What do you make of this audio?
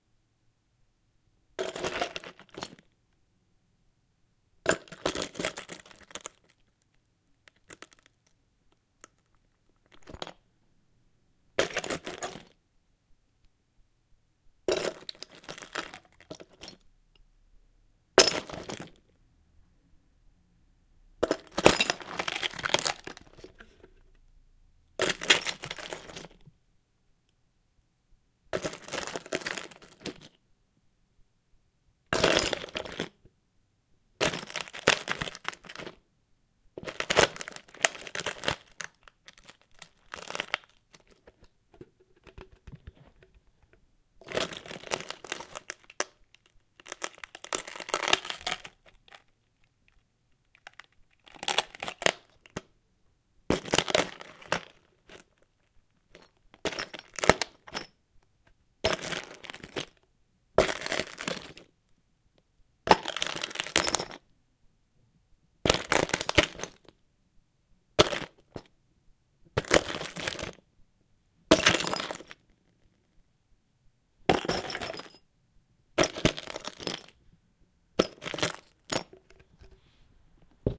Glass shards 1(crack)
Cracking glass shards.
cracking, glass, shards